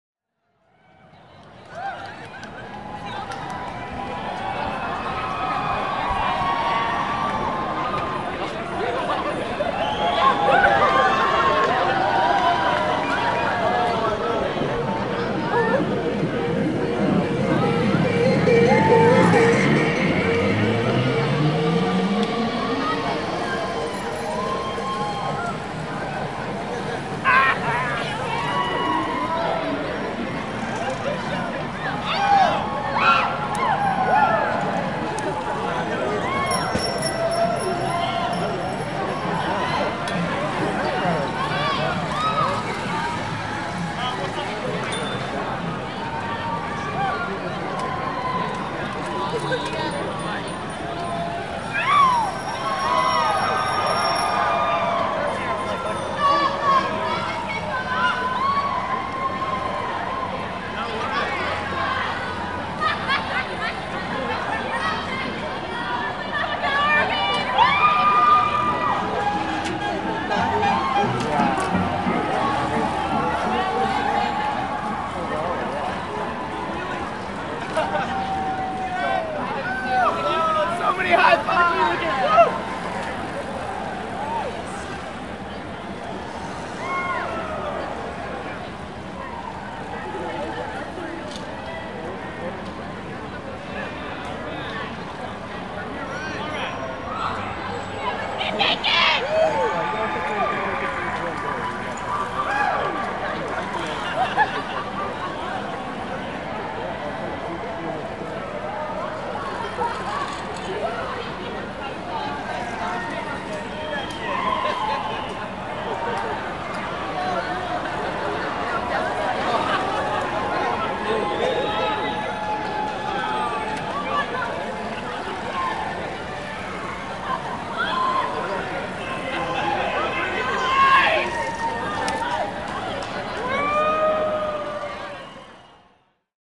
Naked Bike Ride
This is a sound recorded during July, 2011 in Portland Oregon.
bike, bikers, city, naked, oregon, pdx, portland, ride, sound, sounds, soundscape